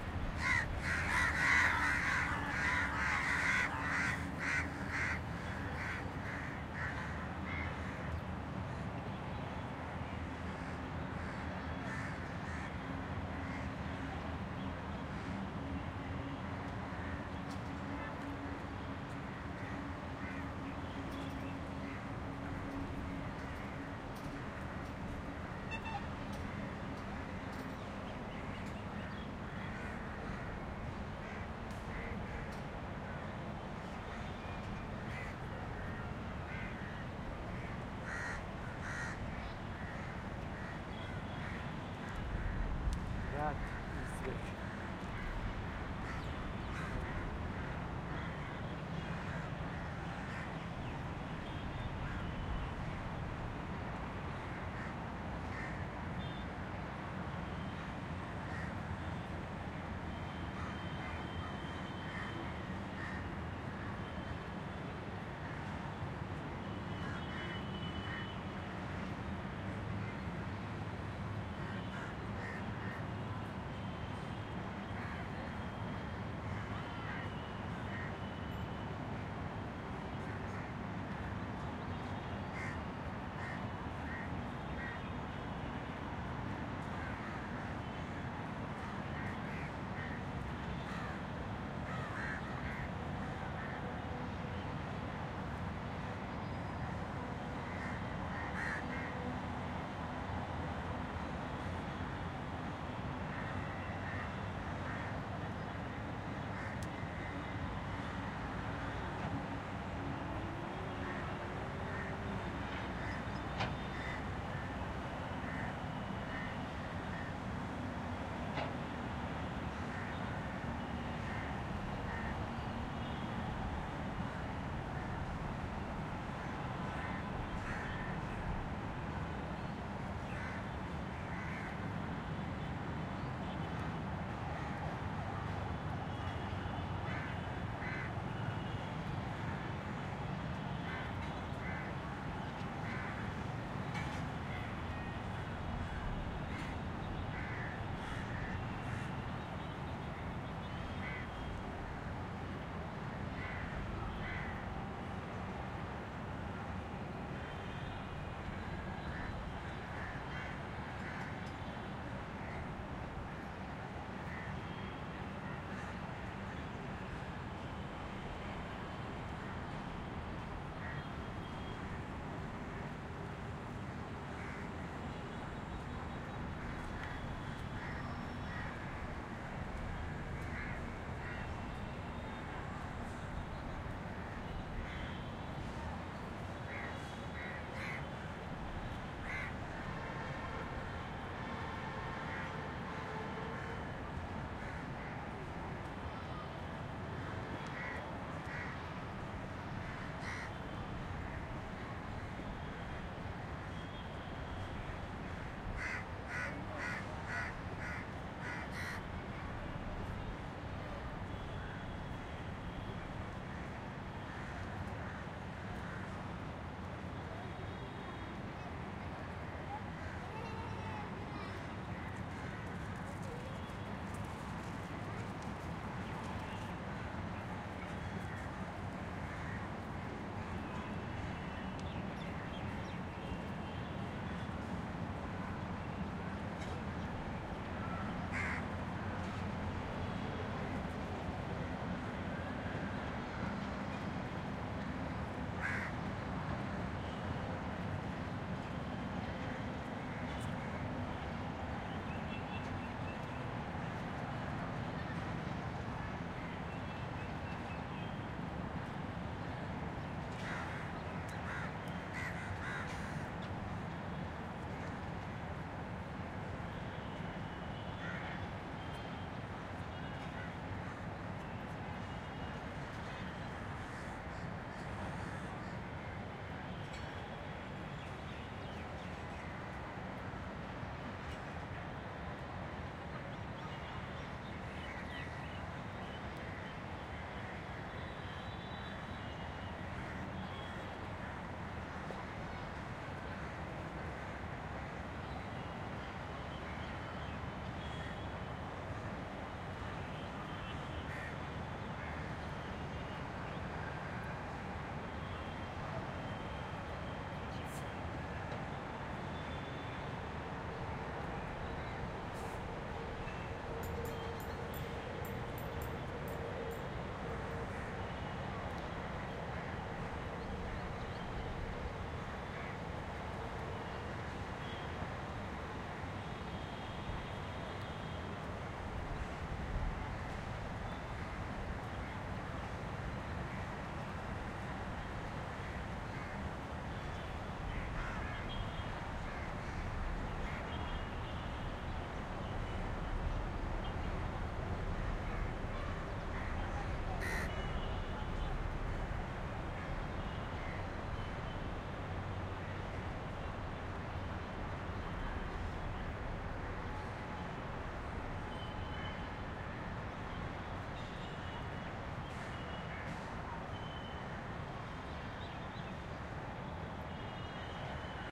general ambience Mumbai
General ambience recorded from the rooftop in Mumbai with nearby traffic, crows, alleyway people talking and general ambience of the city.
recorded with sound devices preamp in stereo through rode nt4 smic
skyline, neighberhood, nearby-traffic, alley, Mumbai, India